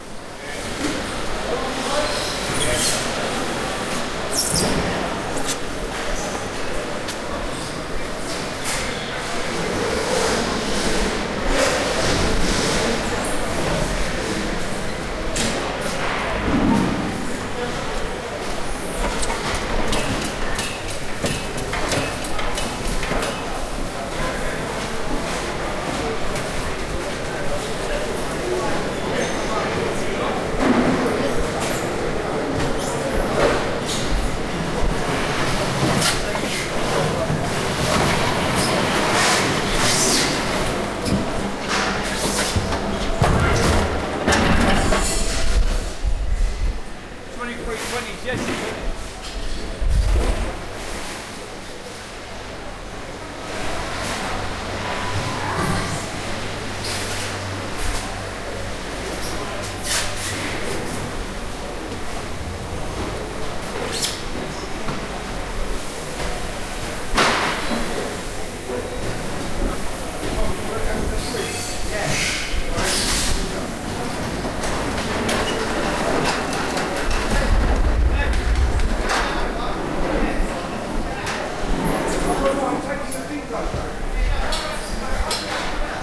BILLINSGATE FISH MARKET LONDON AMBIENCE
Ambience at Billingsgate Fish Market
polystyrene, atmospheric, soundscape, background-sound, Billingsgate, general-noise, box, field-recording, Ambience, ambiance, atmosphere, atmo, Market, background, buzz-track, London, ambient, Fish, Billingsgate-Fish-Market, atmos